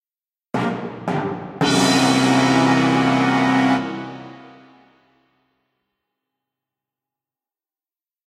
Dun Dun Duuun v.01
Classic film sting.